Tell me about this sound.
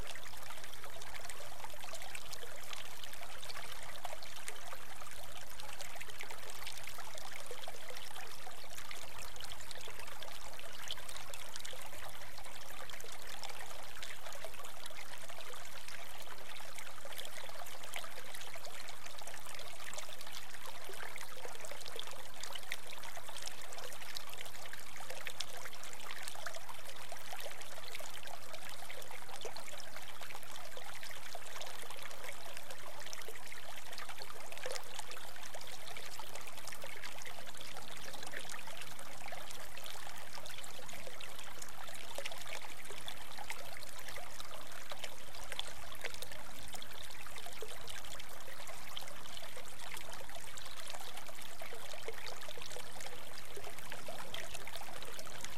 Close up recording of small bubbling creek.